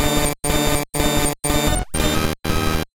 Punishments In Installments - Small Self-Scourgings -629
I had a goal for this pack. I wanted to be able to provide raw resources for anyone who may be interested in either making noise or incorporating noisier elements into music or sound design. A secondary goal was to provide shorter samples for use. My goal was to keep much of this under 30 seconds and I’ve stuck well to that in this pack.
For me noise is liberating. It can be anything. I hope you find a use for this and I hope you may dip your toes into the waters of dissonance, noise, and experimentalism.
-Hew